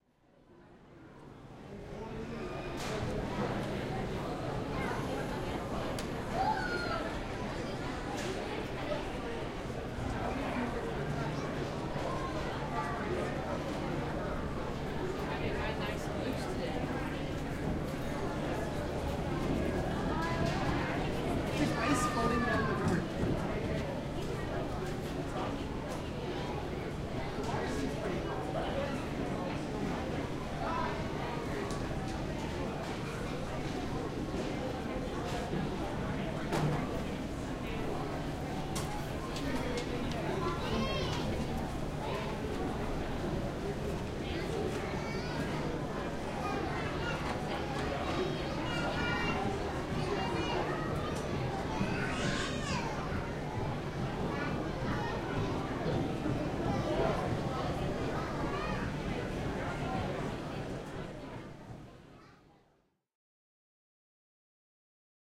Ambiance of food court at the Carnegie Science Museum in Pittsburgh.